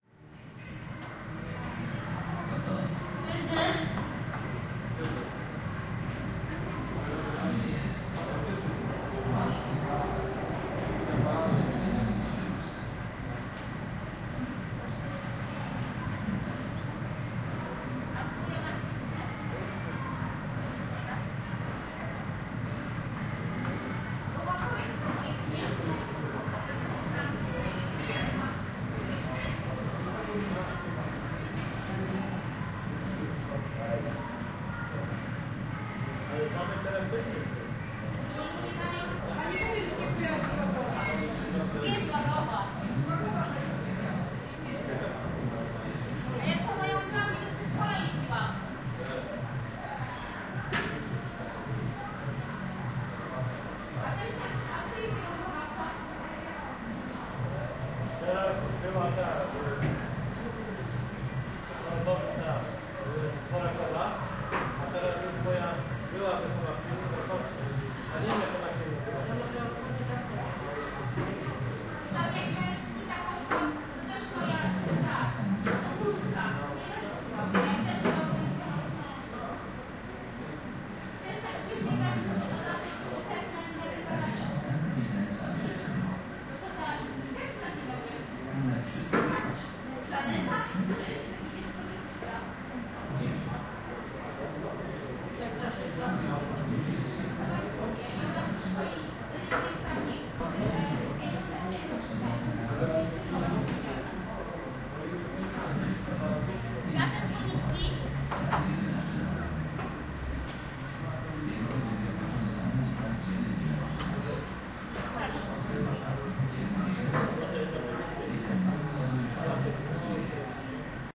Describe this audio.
13092014 mostki las vegas restaurant 002
Fieldrecording made during field pilot reseach (Moving modernization
project conducted in the Department of Ethnology and Cultural
Anthropology at Adam Mickiewicz University in Poznan by Agata Stanisz and Waldemar Kuligowski). Soundscape of the Las Vegas restaurant in Mostki village. Recordist: Robert Rydzewski. Editor: Agata Stanisz